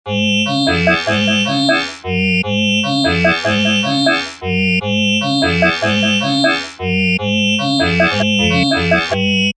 A Buggy Type Sound.